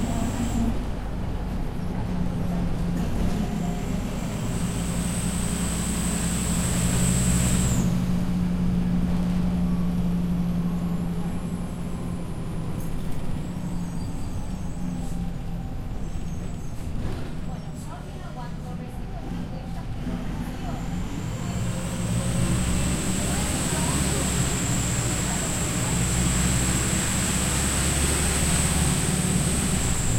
130916 General Paz y Panamericana
field-recording of a bus ride in Buenos Aires, Argentina.